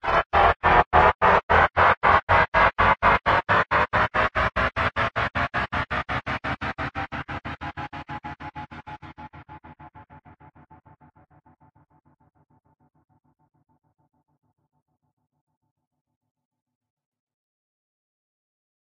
Take Off mono
the spaceship has left the planet.